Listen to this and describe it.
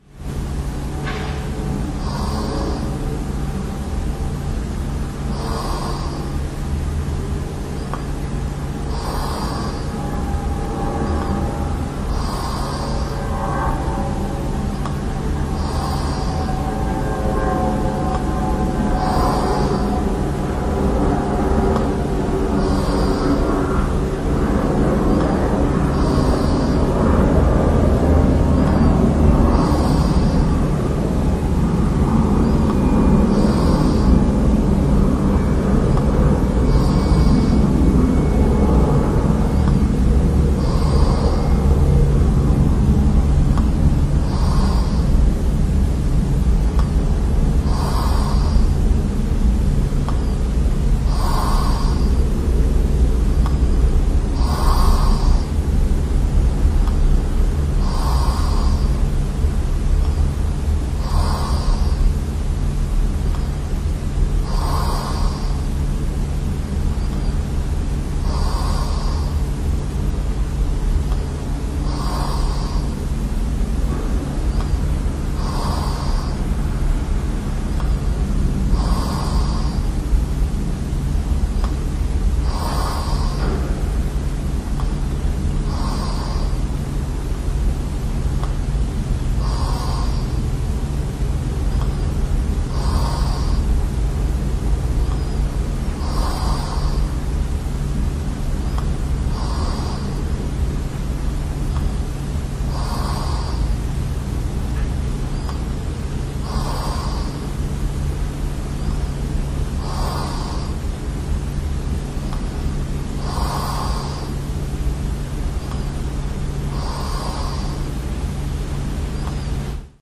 An Airplane that left Amsterdam Airport Schiphol a short while ago, passes me sleeping. I haven't heard it but my Olympus WS-100 registered it because I didn't switch it off when I fell asleep.
airplane, bed, engine, field-recording, human, lofi, nature, noise, traffic